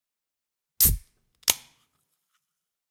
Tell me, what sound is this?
Opening a can of soda or beer.
soda, can, beer, drink, opening, open